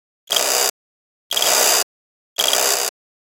synth wind up sound
A synthetic wind-up sound, three turns of the key and off you go! made using grainular methods.